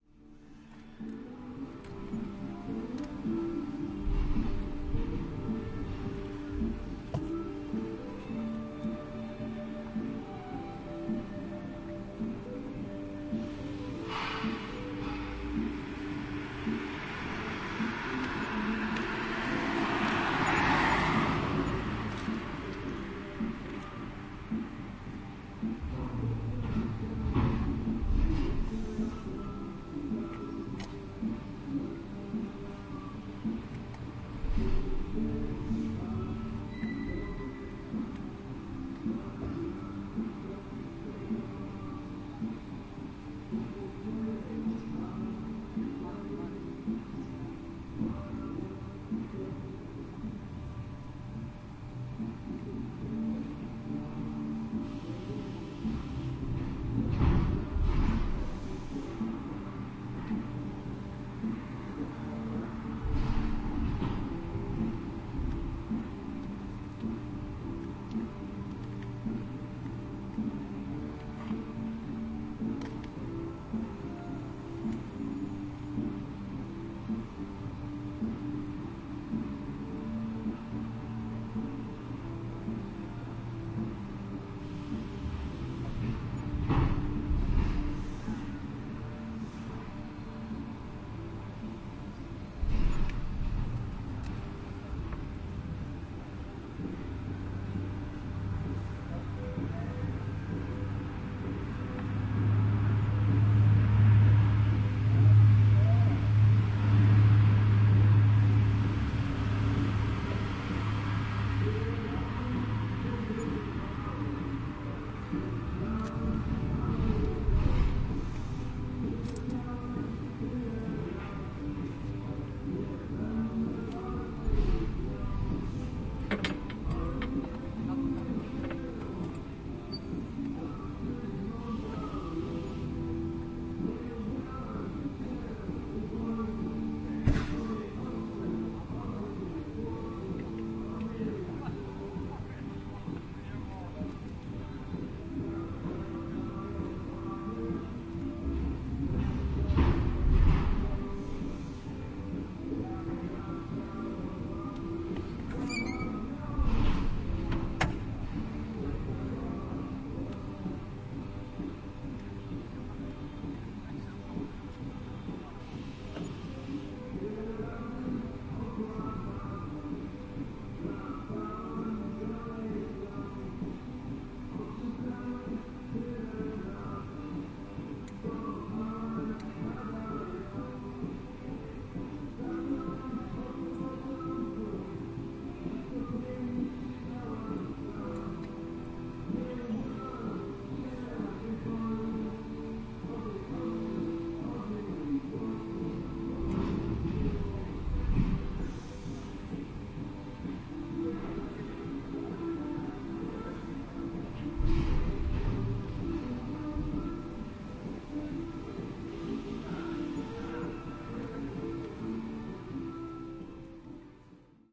06.08.2011: seventh day of ethnographic research about truck drivers culture. second day of three-day pause. Oure in Denmark, fruit-processing plant. ambience: music from the truck parking next to our, banging from the loading ramp, passing by truck.